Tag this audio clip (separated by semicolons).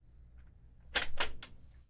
door; lock; open